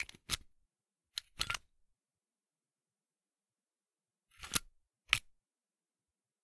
Small Padlock opening closing
A small padlock being opened and closed. This is one of multiple similar sounds in the same sound pack.
close, closing, door, fence, gate, key, keying, keys, lock, locked, locking, open, opening, padlock, shut, unlock, unlocking